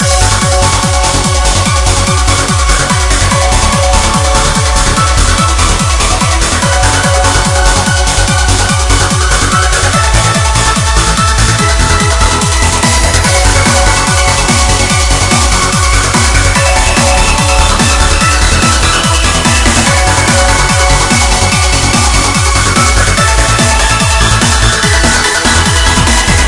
Disthread WIP
song, techno, loop, bass, trance, disthread, colonelix, lead